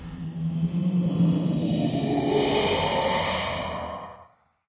scary!I used my laptop's recorder.